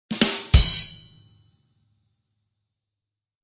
Joke drum fill 02
A short drum fill to mark when a good point has been landed in a joke. Each with a different variation.
Recorded with FL Studio 9,7 beta 10.
Drums by: Toontrack EZDrummer.
Expansion used: "Drumkit from hell".
Mastering: Maximus
Variation 2 of 10
comedy, drums, fills, drumkit-from-hell, laughters, jokes, crowd, ezdrummer, humor